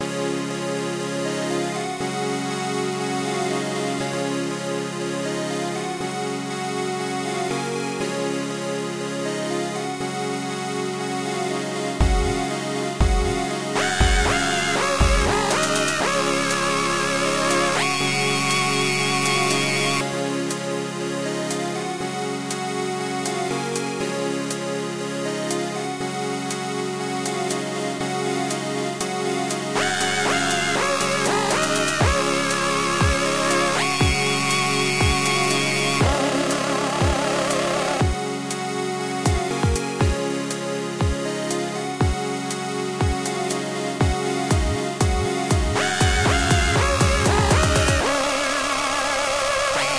digital romance loop
"I tried to make a love song, and this came out instead." Created in LMMS. hi hat, kicker, cheap loop to repeat.
kicker, digital, dance, hip, hard, kick, beat, hop, drum, processed, bass, cool, loop